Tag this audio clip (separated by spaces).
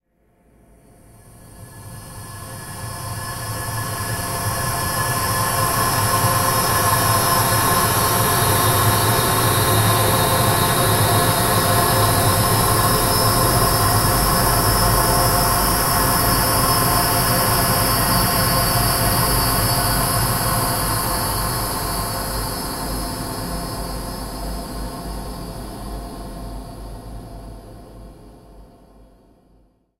pad soundscape cinematic multisample